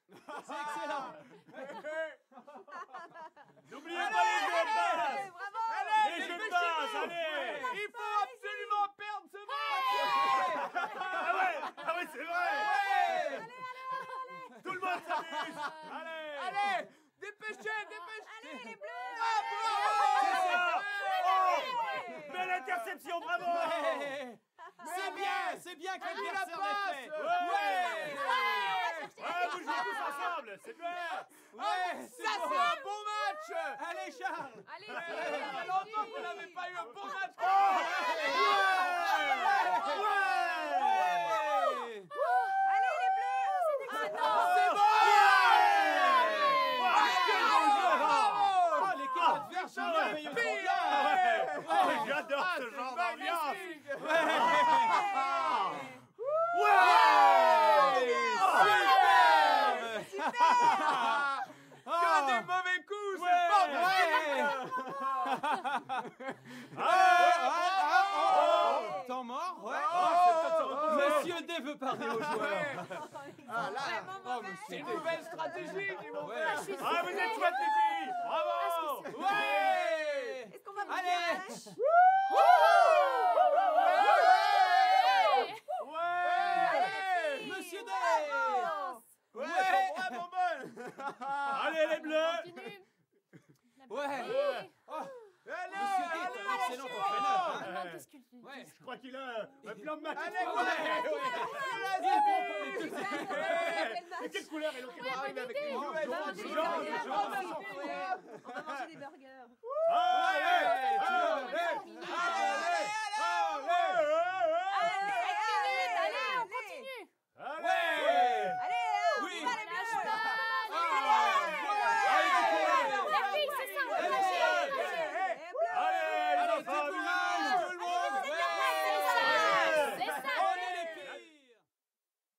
Interior vocal (French) ambiences: crowd cheering at a sporting event
crowd, vocal-ambiences, sports, interior, localization-assets